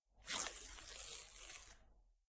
Slow Ripping Of Some Paper 2
Slow ripping sound of some paper.
paper, rip, ripping, slow, tear, tearing